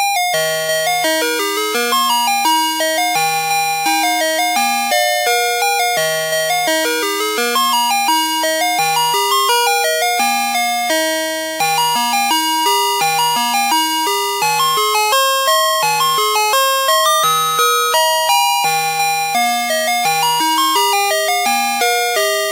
Turkey In The Straw Digital II Chime Song 5
Here you go. This song is quite popular among most ice cream vans that drive around. I like this song too. Please read the description on Chime Song 1 for more info on these songs. Thanks